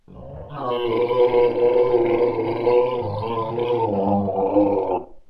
Toilet monster or something
Some guy or creature roaring.
I recorded this track for a cartoon show, but i scrapped it and tried a similar approach, cause this one sounded too much like a monster sitting in the sewers.
creature, liquid, man, monster, toilet